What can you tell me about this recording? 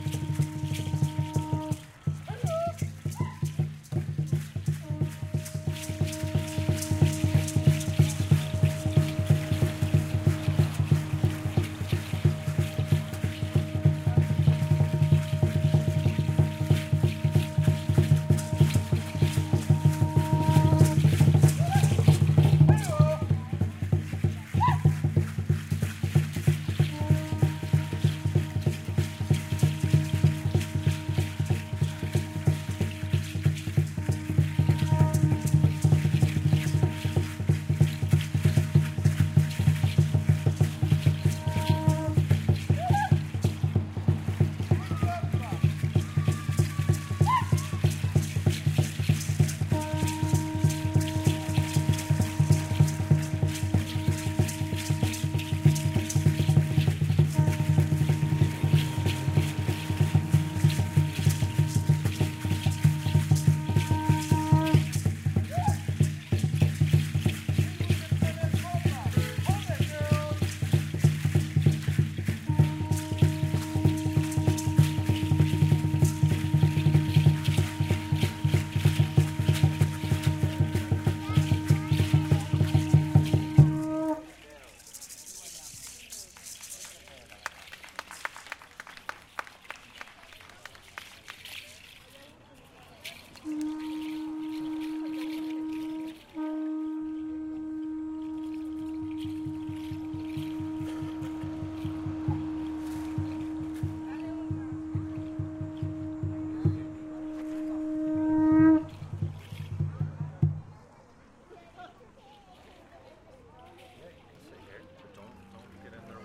Mayan Dance Shakers Drumming Conch Horn Blow
A group dancing and drumming in the streets of Toronto
recorded on a Sony PCM D50 in XY pattern
shakers, horn, dance, blow, conch, drumming, mayan